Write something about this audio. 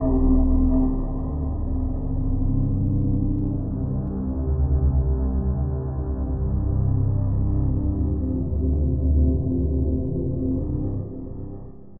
14 ca pads
score, ambience, music, atmos, intro, white-noise, soundscape, atmospheric, horror, suspense, atmosphere, background-sound